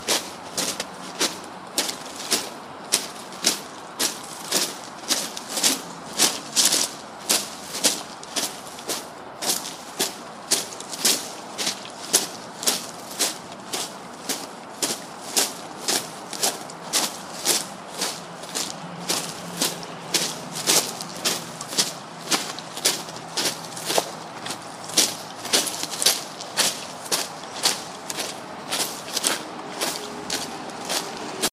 Walking on railroad ballast
Recorded 7-15-2013 at railroad tunnel beneath I-85 in Atlanta, GA, near Armour and Monroe drive.
feet, ballast, walking, railroad